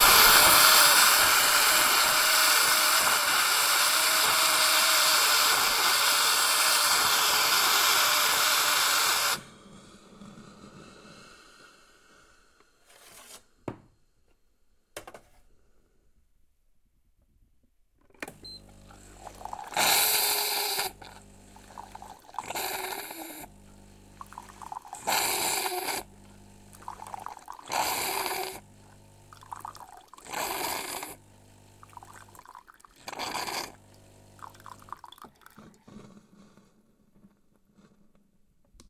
ekspres potwor monster
That's how my coffee express sounds like while cleaning. Something like lord Vader, don't you think?
ZOOM h5
coffee-express, puff, scary-breath